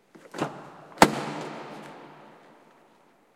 20150712 car.door.opens.01
Car door opens in an underground, almost empty parking. Shure WL183 into Fel preamp, PCM M10 recorder
automobile, car, door, driving, field-recording, parking, underground, vehicle